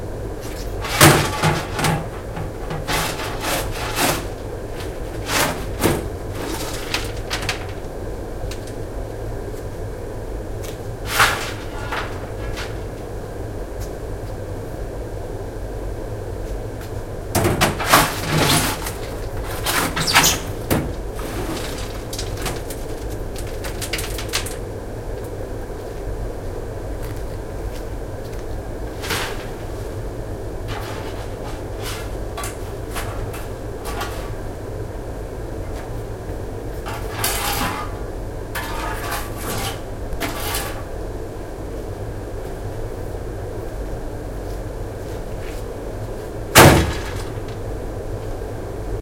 recording myself shoveling coal into a flame in a smithy.
stone, coal, smithy, industrial, room-noise, room-tone, inside, shovel